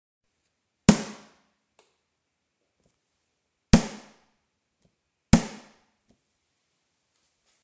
20x12x29 - Tupperware Perc 02
Spoon hitting tupperware container. Sounds like a muted snare
Percussion, Plastic, shplock, Tupperware